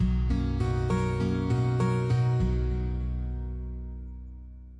Acoustic Chord 1st 4th 7th
These sounds are samples taken from our 'Music Based on Final Fantasy' album which will be released on 25th April 2017.
Music-Based-on-Final-Fantasy, Sample, Acoustic, Chord